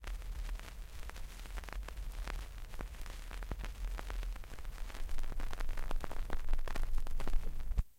vinyl - out 01
The couple seconds of crackle after an old vinyl record ends.
Recorded through USB into Audacity from a Sony PSLX300USB USB Stereo Turntable.
vinyl-record, noisy, LP, vintage, noise, record, crackle, pop, lofi, vinyl, turntable, album, surface-noise